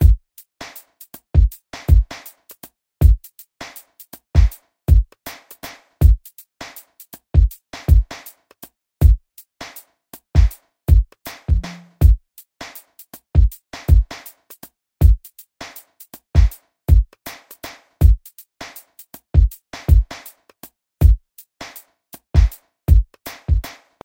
Contact Drum Loop
a drum loop I made for my new song. 80-bpm.
lo-fi, drum, drum-loop, hip-hop, drums, percussion-loop, beat, percussion